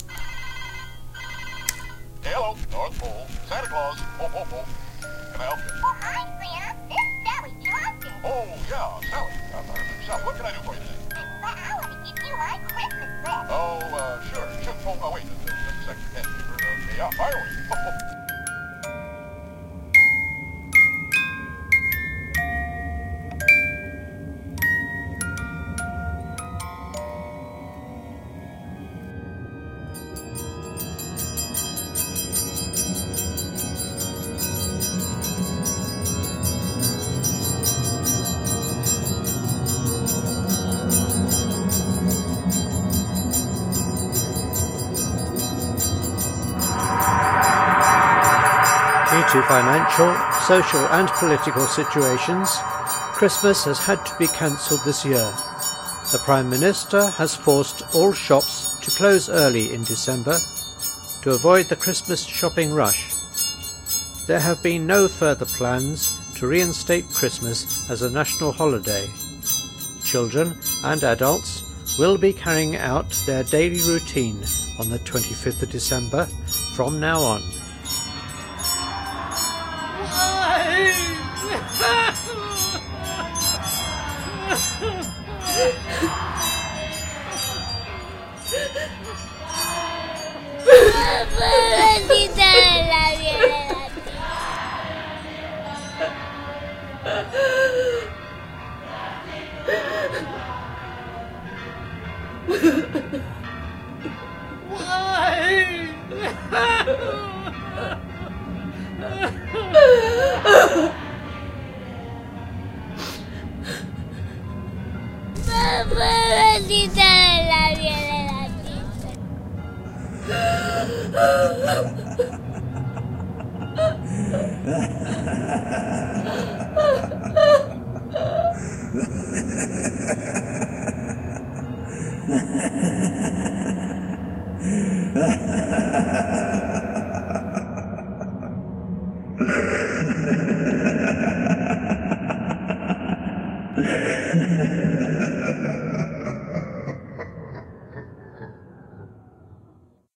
includes tracks from: